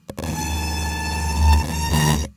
DSGNRise-int techno02short ASD lib-zoom-piezzo-stephan
techno, distorted, riser, electro, buzz